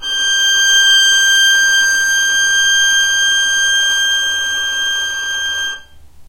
violin arco non vib G5
violin arco non vibrato
arco, non, vibrato, violin